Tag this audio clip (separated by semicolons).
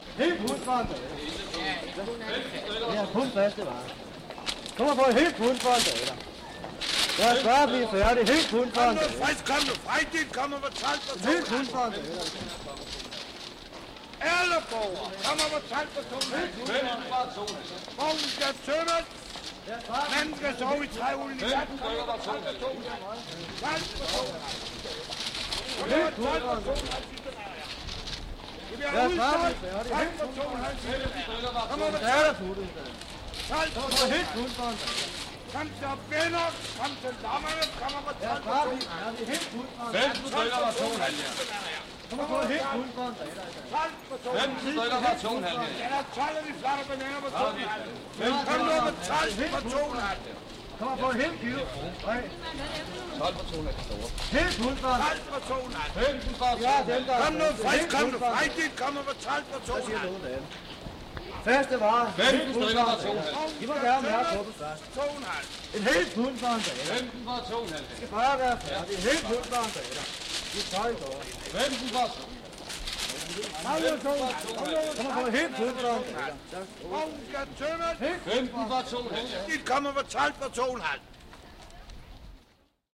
soundsfromdenmark; streetsounds; venders